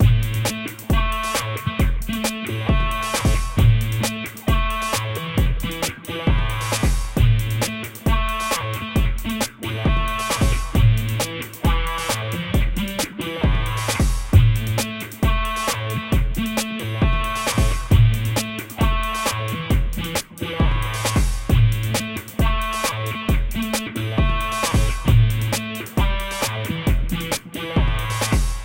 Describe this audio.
Rocky Loop
Here is a loop I created sometime ago when mocking about with Headcase, a VST amp sim (actually pedals, amp, cabs, rack effects... It has got it all! And you can load and tweak amp presents OR design your own - how cool is that?)
Get the demo here, and if you like it, buy it!
Anyway...
I plugged my Korg Monotron into my laptop sound input - This is a very basic mini-synth. With a sensi-strip that dubs as a keyboard for playing but is only about 2 inch long, if that...
This shows you how small it is
Basically, you can get some cool tones, but there are no envelops for volume or filter, so all you get out of it are really different 'flavours' of beeps. (pretty boring, unless you use effects).
You can also use the knobs for filter and frequency sweeps, etc. But considering how small it is, all you are going to be able to do is hold a note and do a sweep.
You really want to connect this to some effects, which is what I did.
I used Ableton Live's own envelope filter (for wah effect)